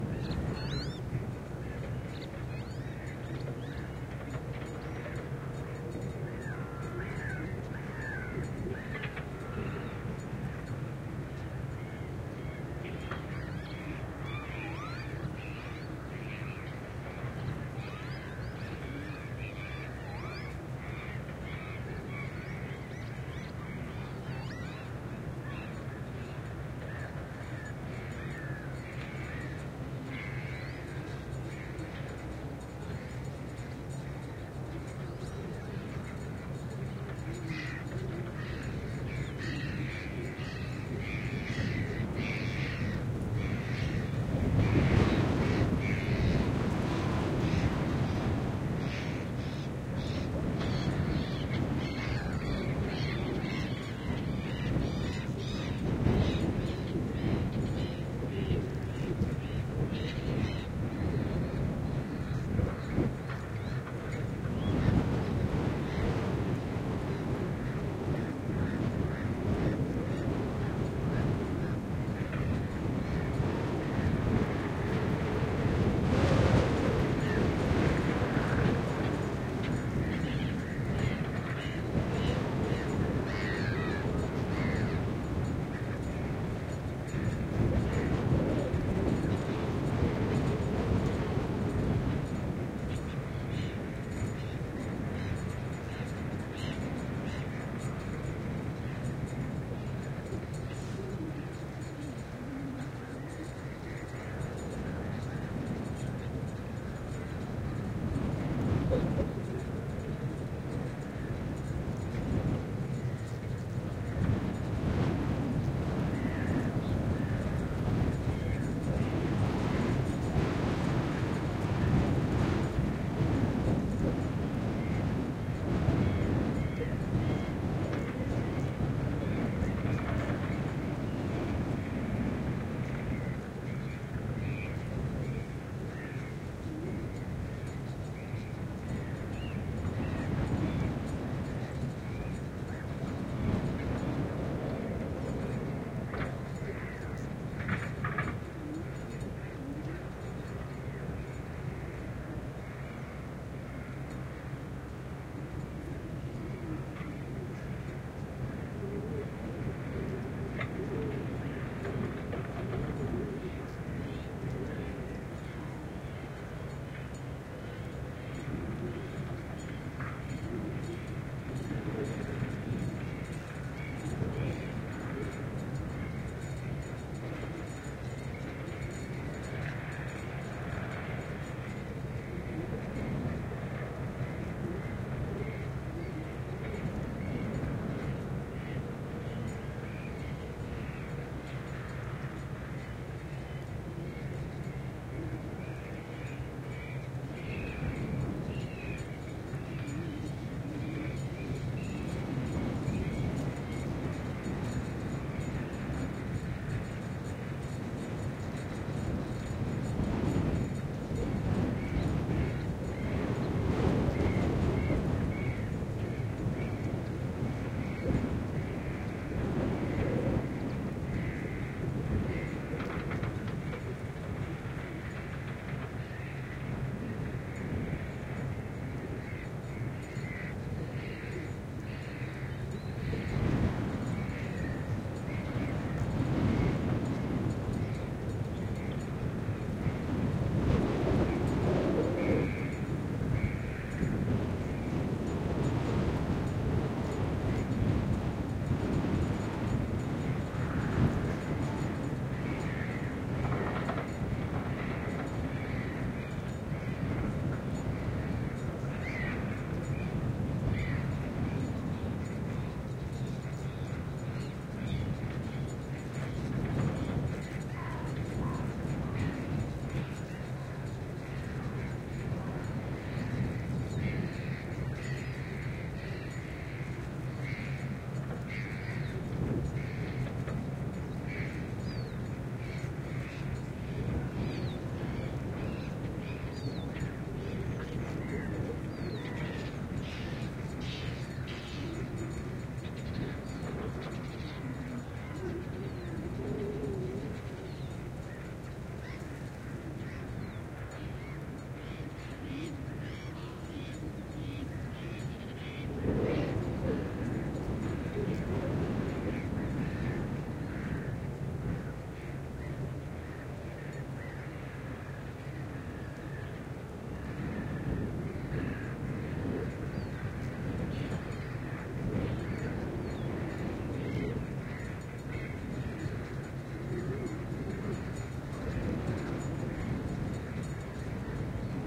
Wind, birds, machine and a metallic ringing in Paljassaare White Tower
This is a stereo setup with UsiPro microphones plus a Geofon attached to a metal nail in a fence. So 3 channels.
Location is called Paljassaare White Tower in google maps.
It is late winter. There is a lot of wind. You can hear the birds that nest in this place and some heavy machinery turning around the soil. I have no idea why they do this. You can also hear a metallic ringing from a loose piece of metal in a nearby powerline post.
Recorded on a MixPre6.
geofon, wind